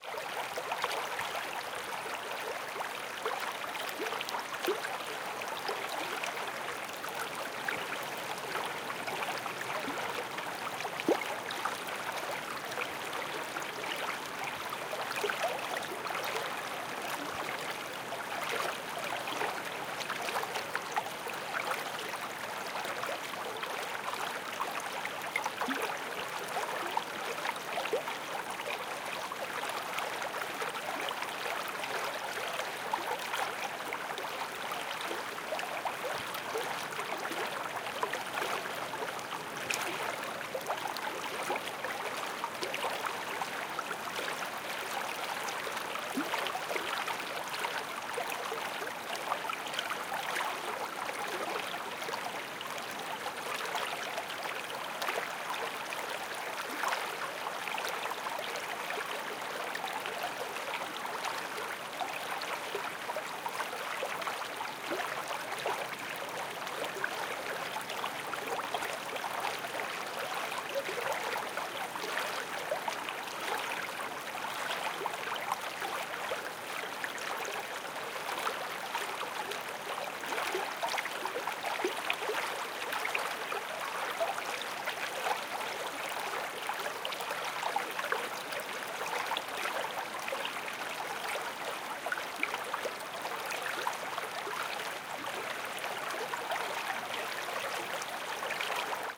Murmuring Stream
A Scottish little stream near Charlestown, Fife. Recorded in February with an Olympus LS-12 and a Rycote windshield.
babbling, brook, creek, flowing, gurgle, liquid, river, water